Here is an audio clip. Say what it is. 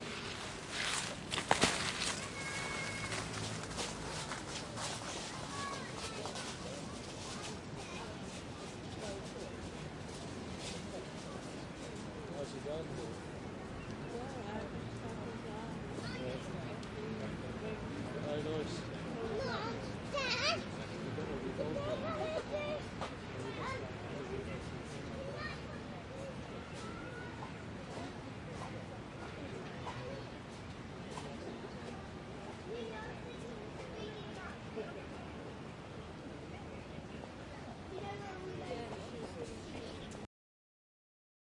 Leaves at Clissold Park
People walking on leaves on Clissold Park, Hackney, 17.10.2020
ambiance, autumn, clissold, field-recording, leaves, nature, park